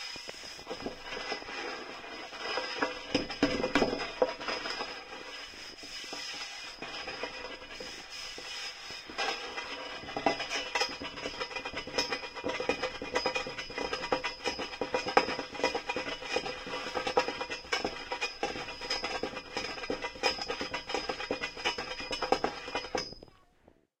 Contact mic recording
field, metal, recording, mic, contact, scaffold